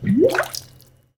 Water bubble recorded in my bathroom sink with a glass. (Sorry, it's not a real fart ;p)
Recorded with B1 and Tubepre.
water, bubble